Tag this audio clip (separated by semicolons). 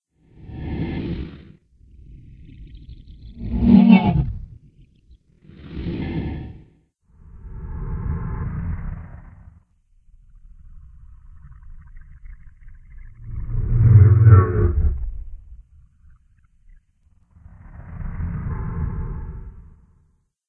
animal; creature; growl